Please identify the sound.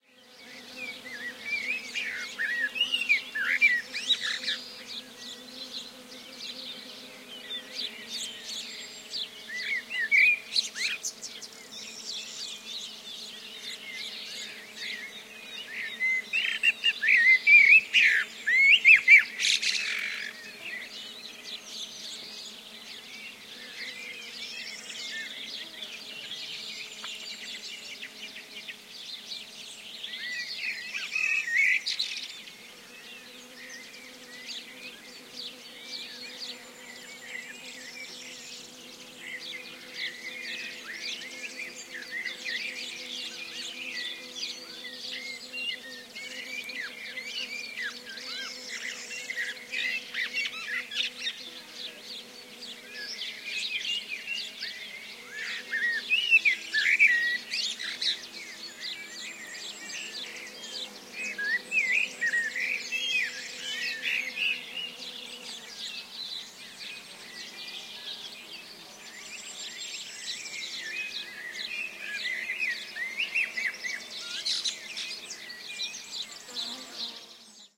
20170501 blackbird.inspired
Blackbird melodious song. Primo EM172 capsules inside widscreens, FEL Microphone Amplifier BMA2, PCM-M10 recorder
birds, birdsong, field-recording, forest, nature, spring